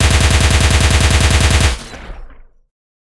SCI-FI Weapon Burs (Dry)
Sci-fi style gun burst. Not a laser.